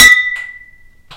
Stone Pale Ale Bottle Clank
Two Stone Pale Ales being clanked together.
Beer-Bottle, Bottle-Clank, Clank, Ting